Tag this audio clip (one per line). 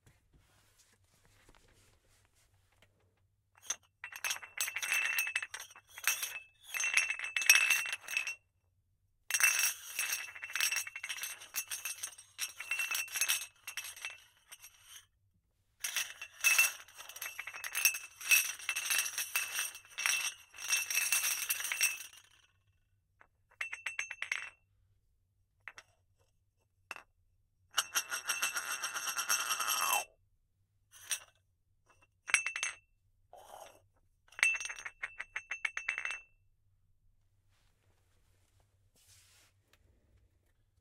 porcelain
plates
crockery
rattle
rattling
cups